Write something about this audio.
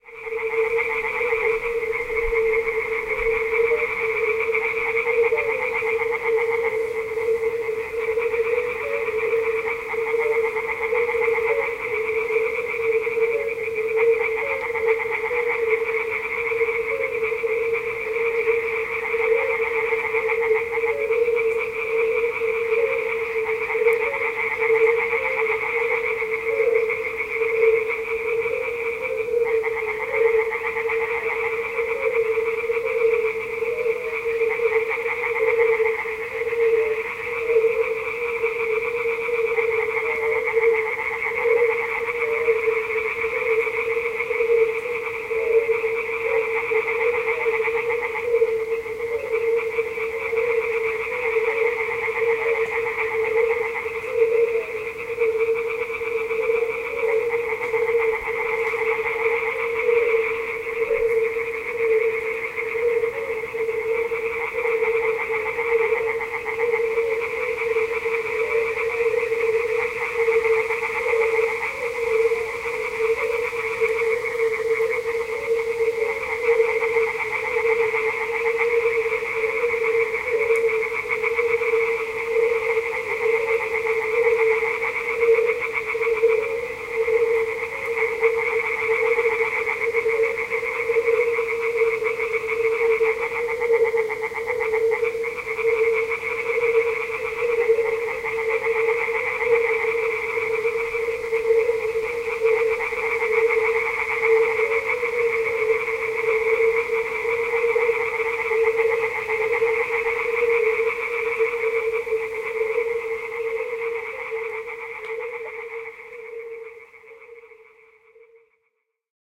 Swamp at night ambiance (pond) in Southern Bohemia (Czech Republic, Europe, Nezarka)
Croaking frogs at spring night
Captured by Zoom H6 (M-S)
Bohemia, croak, croaking, field-recording, frog, frogs, nature, night, pond, swamp